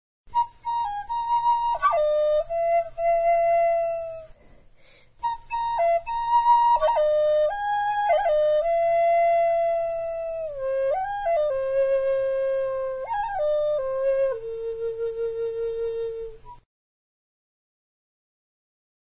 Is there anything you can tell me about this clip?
happy bird raw
This is a 15 or so second sample of a branch flute made from yellow cedar in the key of A sharp. It has a clear crip sound which comes from a hard wood such as this and it reminds me of a very happy bird singing. There is no added echo or enhancement in this track.